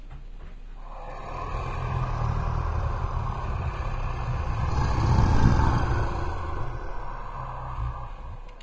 Low echoing growl of a monster.